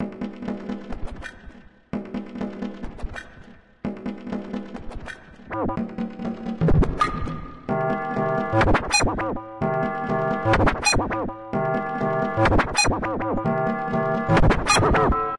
Urban Tribe
A New edgy tribal for some type sound.
aero-diluted, wavey, dubby